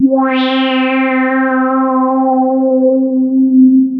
Multisamples created with subsynth. Eerie horror film sound in middle and higher registers.
evil, horror, subtractive, synthesis